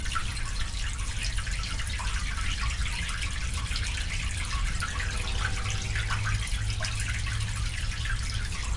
Water in Sewer 1
This is a Medium Mid perspective point of view or water running through a storm drain. Location Recording with a Edirol R09 and a Sony ECS MS 907 Stereo Microphone.
storm-drain, water, water-running